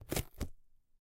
Carton toc 4
Those are a few hits and impact sounds made with or on carton. Might get in handy when working with a carton-based world (I made them for that purpose).
Carton, hit, impact, paper, short, sound-design, sound-fx, stab